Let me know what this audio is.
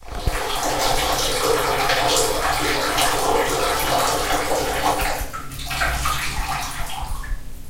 piss on the bath
This is the sound of someone making pee in a toilet of the Roc Boronat building of the UPF campus, at 13:50. This sound is continius and high frequency.
Recorded using Zoom H4, normalized and fade-in/fade-out added with Audacity.